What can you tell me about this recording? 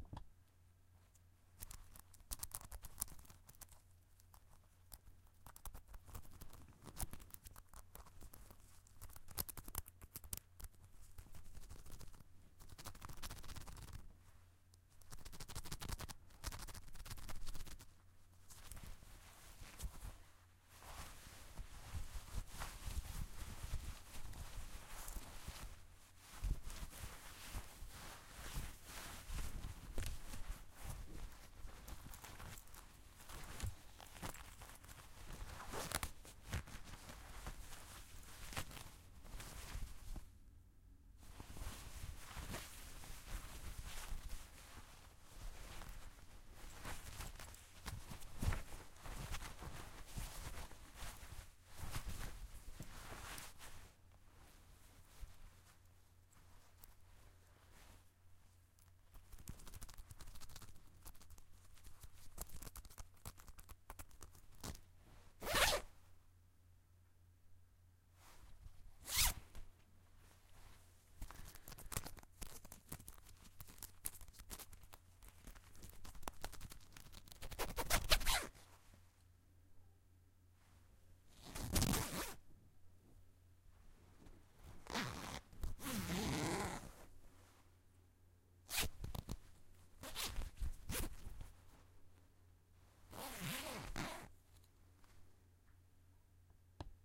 zipper and hoodie clothes rustle
various sounds of a zipper on a hoodie being zipped, and struggled with, and eventually zipped up fully, interspersed with the cloth of the hoodie being rustled and rubbed against itself
clothing, zipper, clothes, zipping, rustle, zip, cloth, rustling